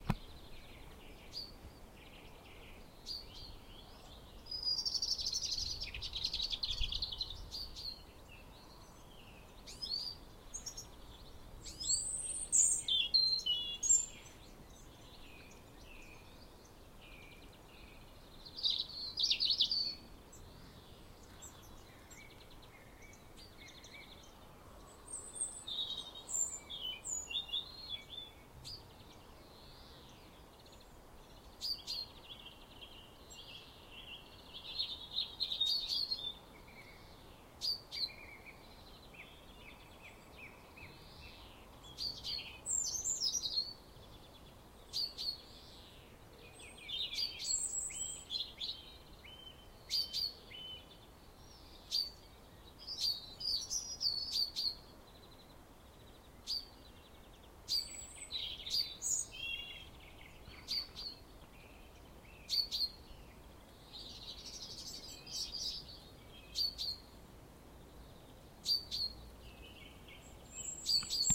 Robin, song thrush and chaffinch in background
Various birds singing and calling along a tree lined road using a Zoom H2n on the Black Isle, Highlands, Scotland